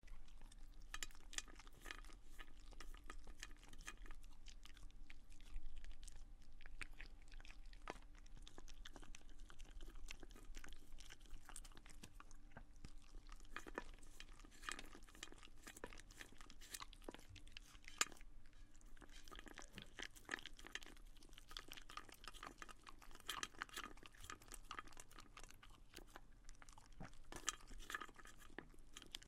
dog eating food from bowl; close
A close shot of my dog eating his food from a bowl.
animal, bowl, dog, dogfood, dogs, eat, eating, food, licking, pet, pets